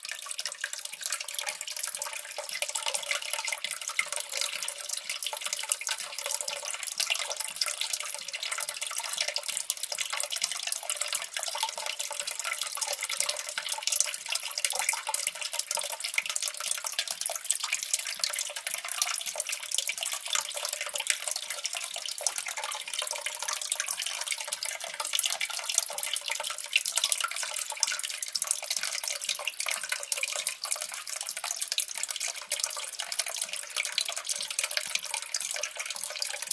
Water flowing down a small drainpipe- loops. Recorded with a 5th-gen iPod touch. Edited with Audacity.
liquid, trickle, drain, dripping, splash, drip, pipe, drainpipe, purist, flow, water